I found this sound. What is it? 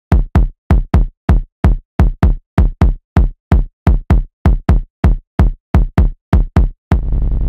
Experimental Kick Loops (3)
A collection of low end bass kick loops perfect for techno,experimental and rhythmic electronic music. Loop audio files.
beat, kick, groove, design, end, drum, percs, rhythmic, 4, groovy, bass, BARS, Low, drum-loop, loop, 2BARS, percussion-loop, Techno, dance, 120BPM, sound, rhythm